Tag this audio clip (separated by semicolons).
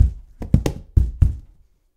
0; boxes; egoless; natural; sounds; stomping; vol